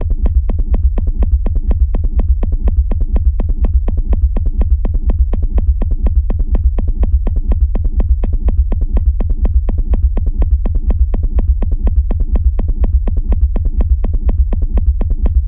Bass for a techno track